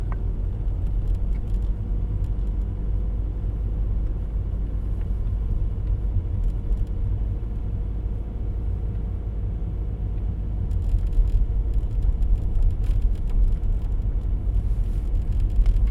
car inside driving slow diesel engine
Slow driving of a diesel engine car (2007 VW Passat) recorded inside with a Zoom 4Hn in mono 16bit 44100kHz. Uncompressed.
diesel, 16bit, driving, car, slow, inside, 44100khz, engine, mono